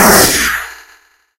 Here is a gun fire sound I made with my mouth then messed with in Audacity.